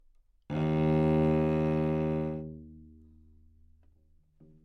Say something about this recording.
Part of the Good-sounds dataset of monophonic instrumental sounds.
instrument::cello
note::D#
octave::2
midi note::27
good-sounds-id::4268